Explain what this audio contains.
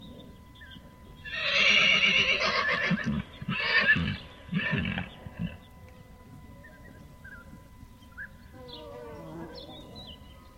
20060419.horse.neigh
a horse neighs /un caballo relincha